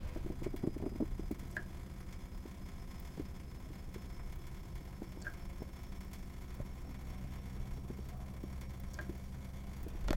the sound of a dripping tap in a house in london